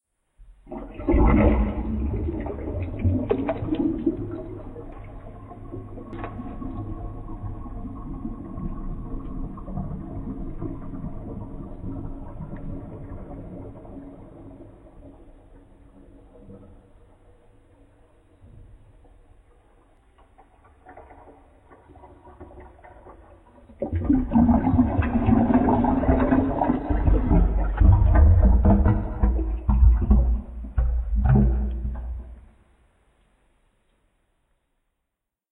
Under Water Echo (Water SFX remixed)
This is a echo version of "Under Water (Water SFX remixed)" and it's without the added deep drain sound in it. Hope this sounds good!